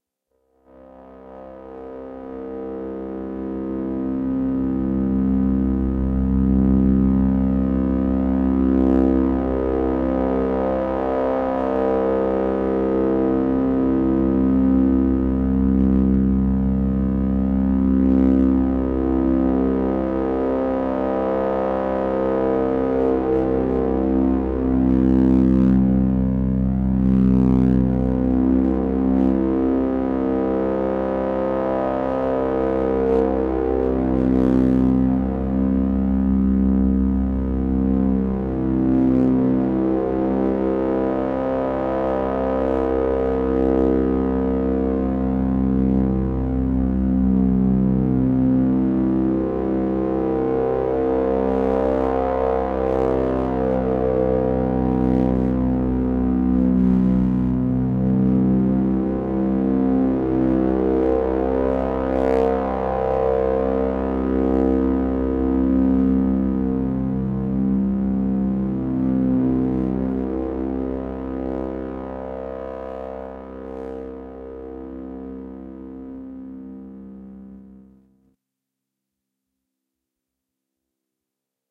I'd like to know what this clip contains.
Scifi Microbrute 12
From the series of scifi drones from an Arturia Microbrute, Roland SP-404SX and sometimes a Casio SK-1.
drone, dronesoundtv, microbrute, sci-fi, scifi, synthesizer